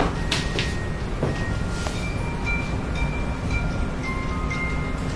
A broken music doll playing on its own. Must credit either "SMSWorld14" or "hauntingonsw" for use!
creepy, doll, eerie, ghost, haunted, horror, music, scary, spooky, suspense, weird